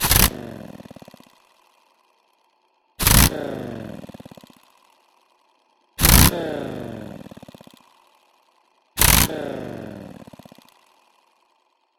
Fuji f7vh pneumatic angle grinder started four times.